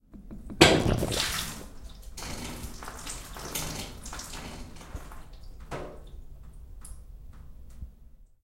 tub fart
Shower shutting off and water being diverted to the tub faucet, plus draining.
fall, drain, room, bath, burst, water, tub